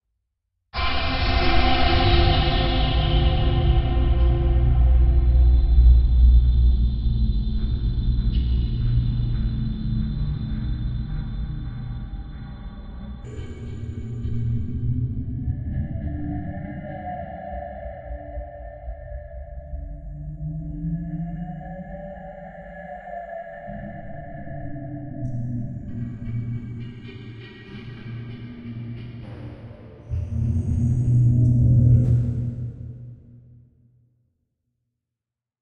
Oh Noise2
A granular texture generated in Kontakt, recorded live to disk in Logic and edited in BIAS Peak.
electronic, granular, processed, soundscape, synthesized